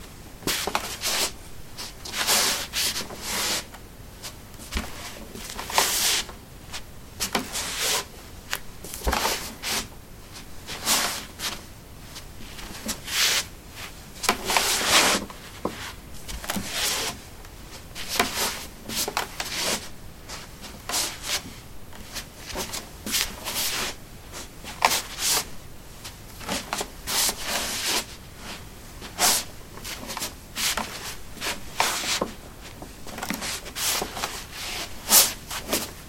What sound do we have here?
wood 03b slippers shuffle
Shuffling on a wooden floor: slippers. Recorded with a ZOOM H2 in a basement of a house: a large wooden table placed on a carpet over concrete. Normalized with Audacity.